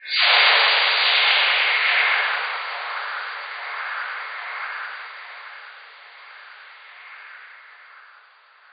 digifishmusic Eastern Whipbird 4X Slower channelshots-rwrk
remix of "Eastern Whipbird 4X Slower" added by digifishmusic.
accelerate, edit, stereo fx, delay, filter, reverb